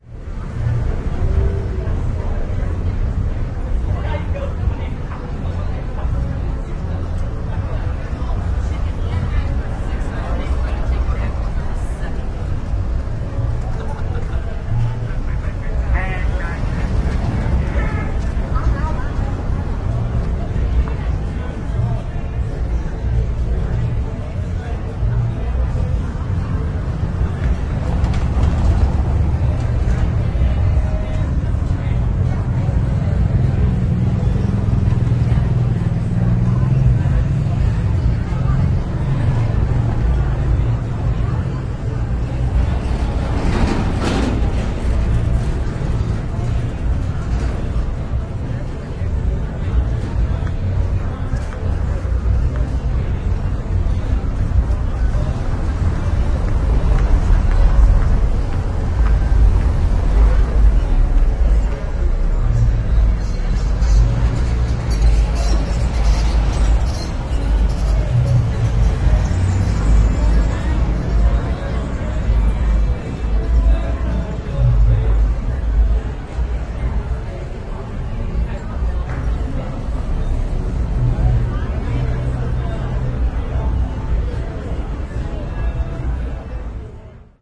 puerto vallarta street1
Evening street sounds recorded in binaural while
standing on the sidewalk of Olas Altas street in
Puerto Vallarta Mexico. Some highlights: a couple
of interesting vehicles go by, one rattly and one
squeaky. Plus, a guy walking by quacks like a
duck.
Recorded with Sony Minidisk MZ-N707 and wearing
headphones modified with microphones mounted in
each side.
noise, binaural, field-recording, traffic